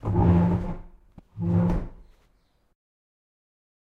chair moving
sliding or moving of a chair.
chair, moving, OWI, sliding, squeaky